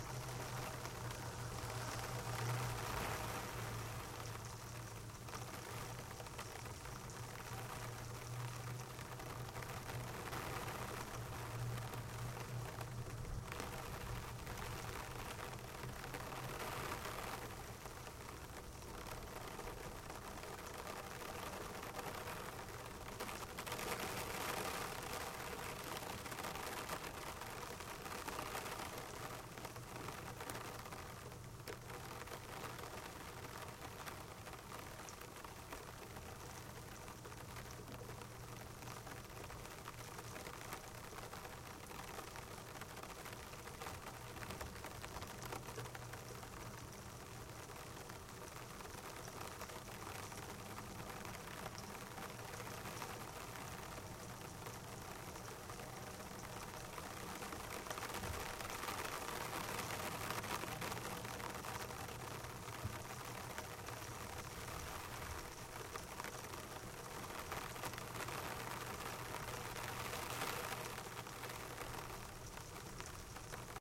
LightRain PlasticSkylight
A field recording of light rain as it falls steadily in a hilly suburban area near the sea shore. The microphone was placed on a third-story roof window in the framing of a plexiglass/plastic skylight facing the opposing street. You can hear the rain falling onto the roof, plastic window pane, and deck below. An unedited 1 minute sample.